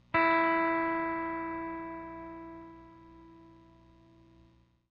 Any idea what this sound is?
The E string of a Squire Jaguar guitar.
electric, guitar, jaguar, note, sample, squire, string